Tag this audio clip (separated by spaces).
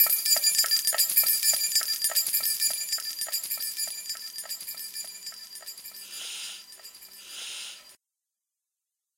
keys effect hi ringing